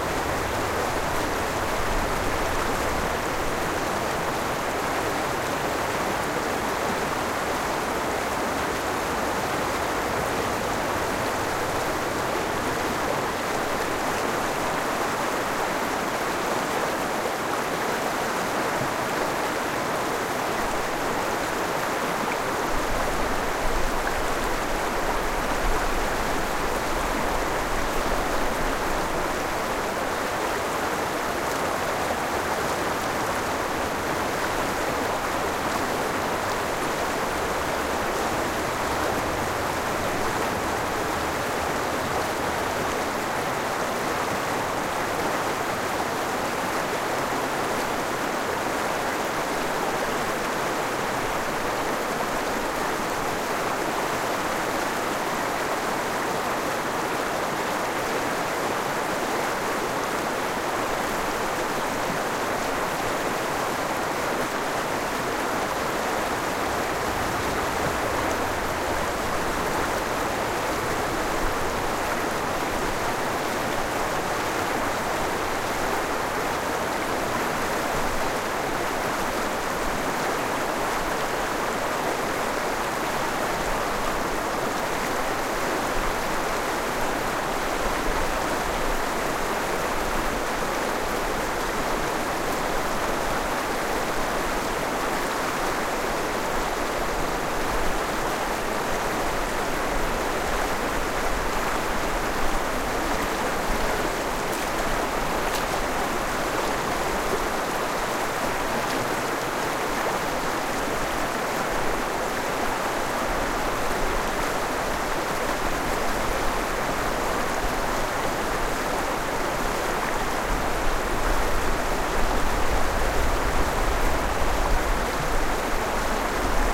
Relaxing water sound
RELAXING RIVER FLOW SOUND:
You are good to use this sound.
If you want to see the video for this sound
THANKS!